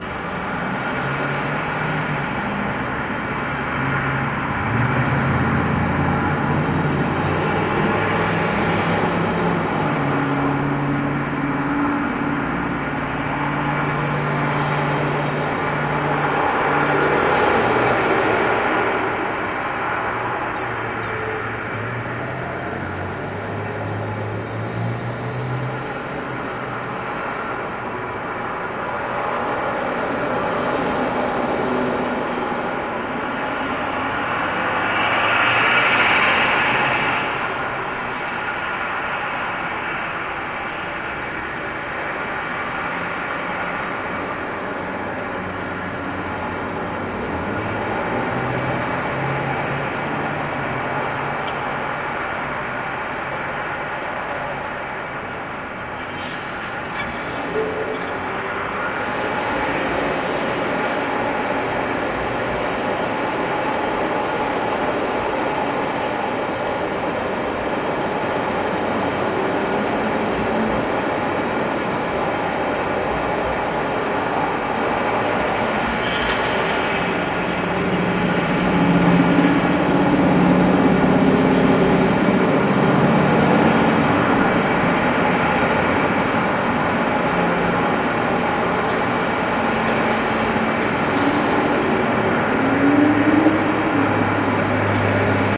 dark eerie ambient using traffic 2
dark, eerie, ambient, traffic